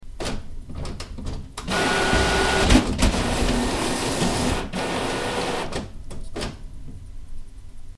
My printer doing some printing.
drucker, machine, paper, print, printer, printing, scan